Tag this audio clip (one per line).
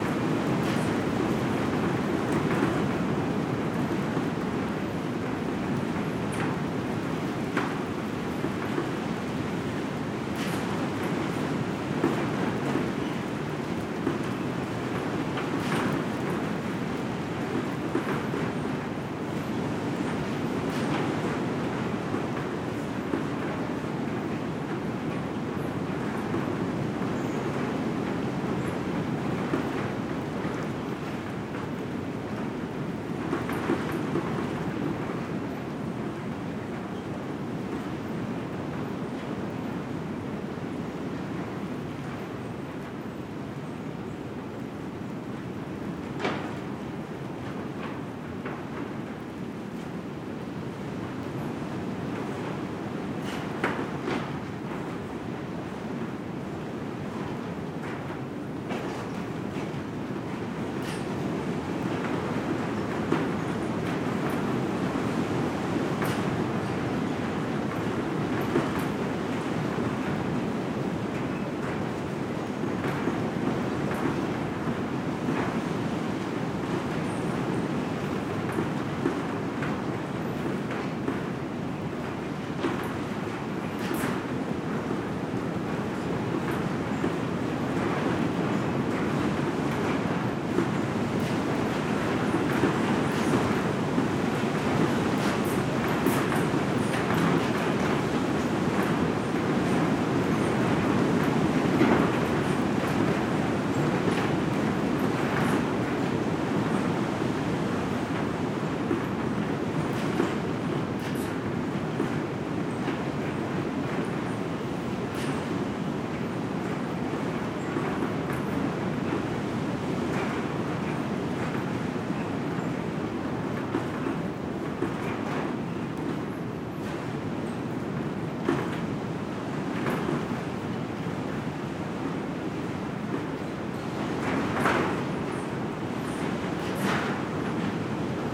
door
storm
shake
rattle
wind
gate
gust
wood
barn
country